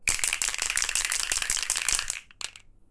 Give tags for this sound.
aerosol art can foley graffiti metal paint plastic rattle shake spray spraycan spray-paint spraypaint street-art tag tagging

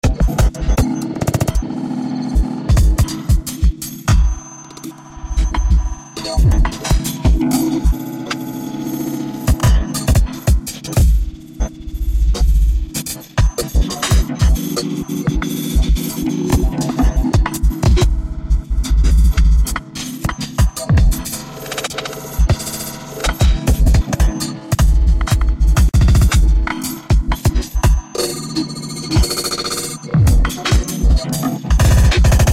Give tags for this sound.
118 16 bars bpm glitch granular house ls remix sexy synthesis

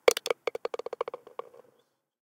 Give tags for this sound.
ball sfx golf

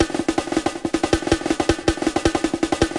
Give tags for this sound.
breakbeat drums programmed

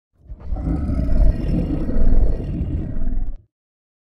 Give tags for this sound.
future gurgling sci-fi space alien beast growl grr growling

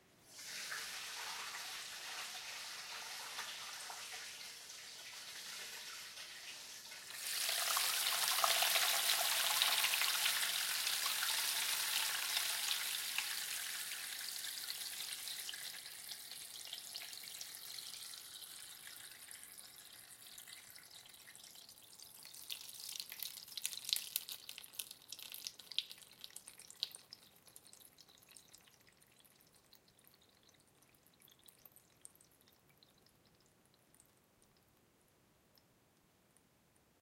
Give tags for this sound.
flow liquid pipes stream water

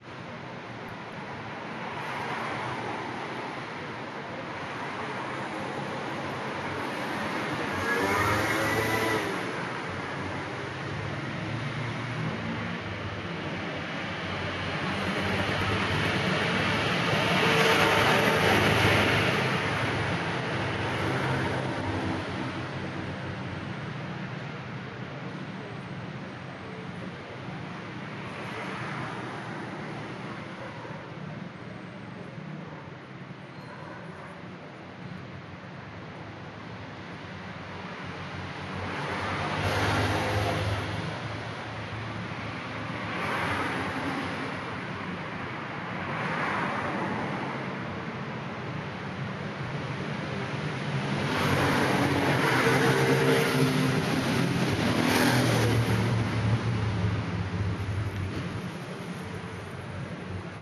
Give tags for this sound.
ambient ambient-noise background background-noise car city firenze florence noise

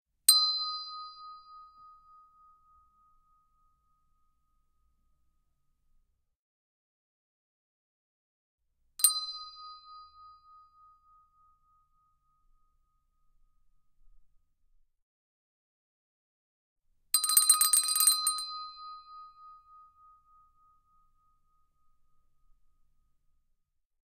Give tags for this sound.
tuned English-handbells ring chromatic bell double percussion handbell stereo single

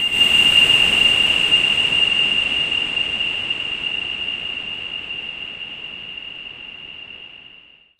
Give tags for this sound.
blow industrial pad reaktor

